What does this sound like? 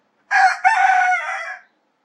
The Rooster in my yard
This is the sound of one of my roosters showing of the neighbors rooster.
rooster, chicken, cock-a-doodle-doo